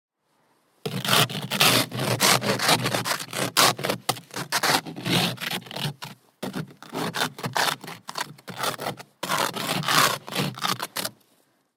Icy car
Removing ice from a car. Recorded with a Zoom H1.
car, field-recording, frost, ice, snow, winter